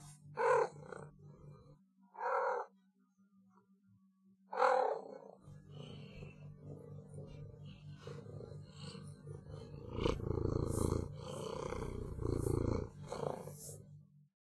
Surprised cat purring
My cat getting surprised and starts to purr. Recorded with a Zoom H5.
purring, surprised